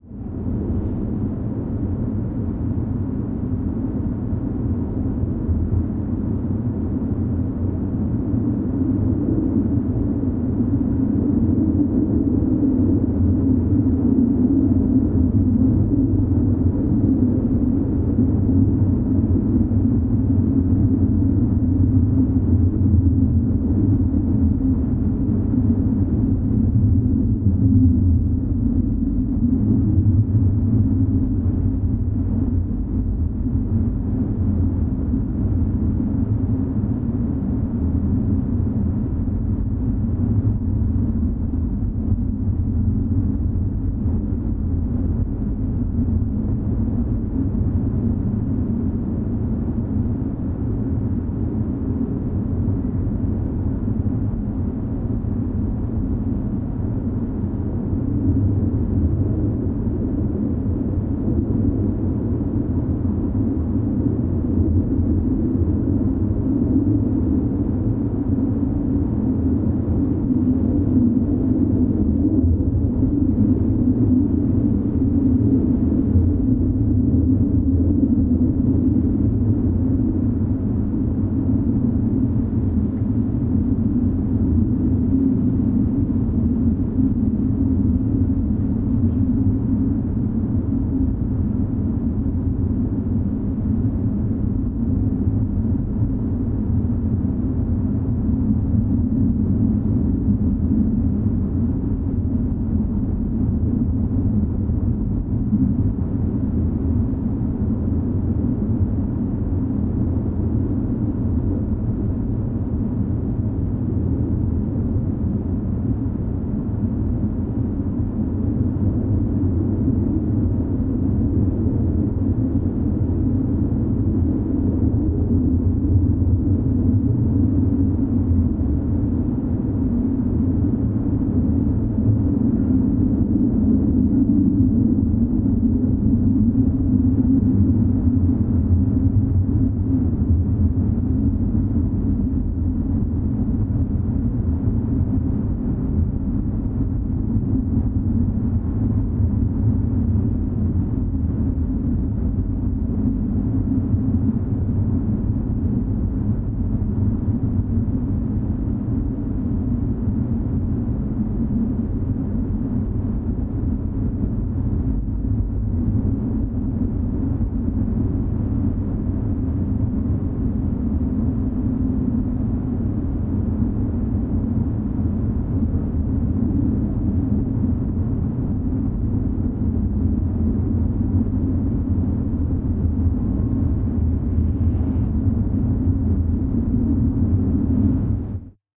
Scary Ambiance
Scary low wind ambiance
ambiance, ashley, brastad, horror, low, powerline, scary, sfx, sounds, wind